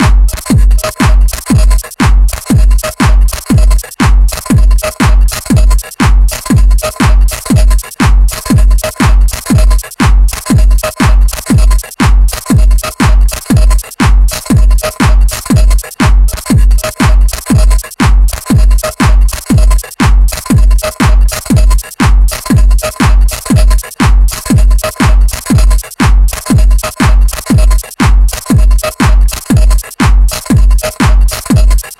An assembled loop made out of samples, some of them being from free vsts like Adern KickMe and Dream Drums and are further processed using free effect plugins. Other are from free sample packs and are also gently processed to death and beyond.
Percussion, Beat, Techno, House, Oldschool, Loop, Gritty, Drums, Dance